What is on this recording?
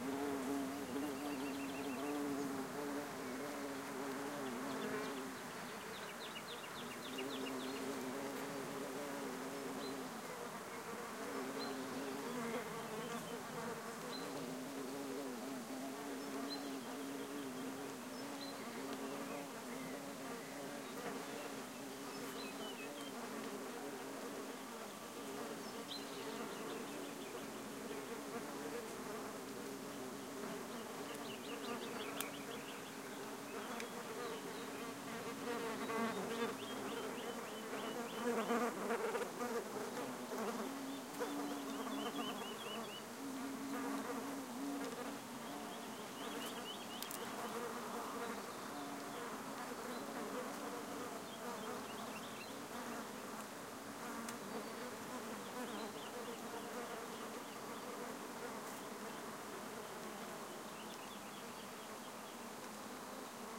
20150401 04.flowering.bush.with.bees
Ambiance near a blooming bush of Lavandula with lots of bees foraging on flowers. Primo EM172 capsules inside widscreens, FEL Microphone Amplifier BMA2, PCM-M10 recorder. Recorded near Aceña de la Borrega, Cáceres Province (Extremadura, Spain)